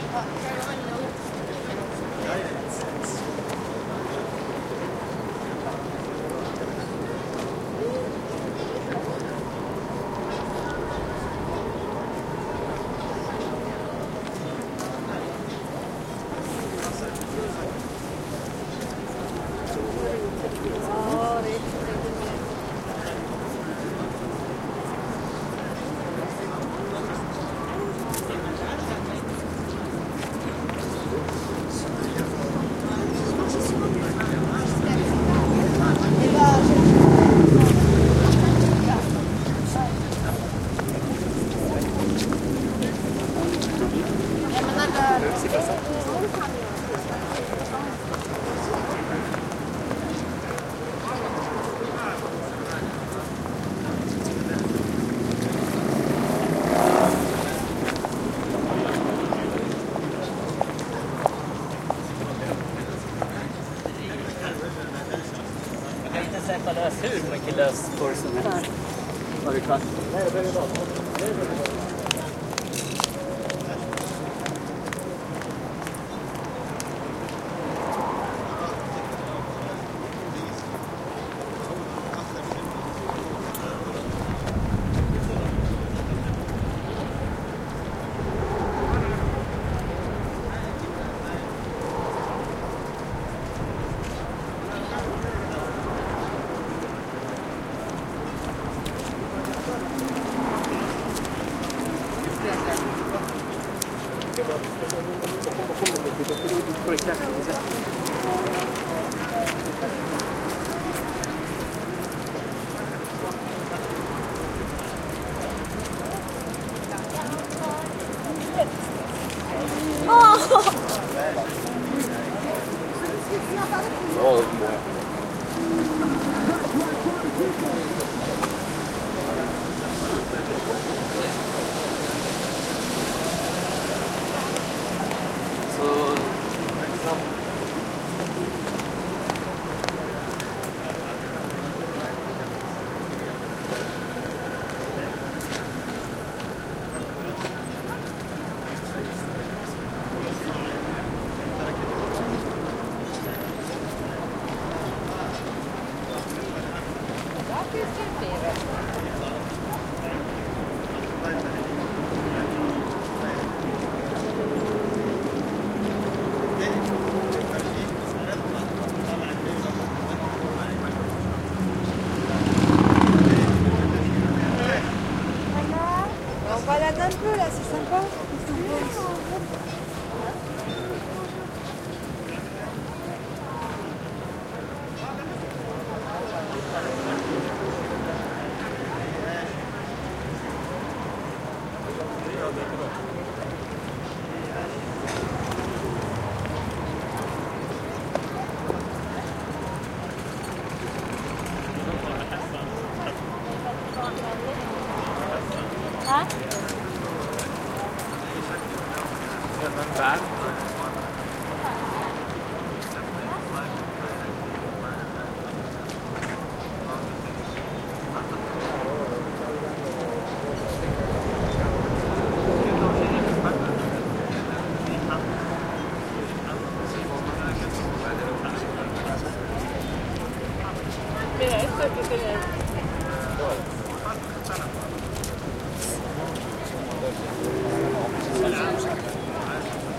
20100806.stockholm.street.ambiance
ambiance along the Strandvagen in Stockholm. Olympus LS10 recorder
ambiance, city, field-recording, footsteps, pedestrian, stockholm, talk, traffic, voices